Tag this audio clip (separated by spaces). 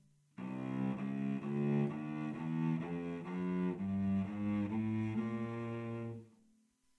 chromatic-notes C-scale music musical musical-notes notes scales string stringed-instrument strings violoncello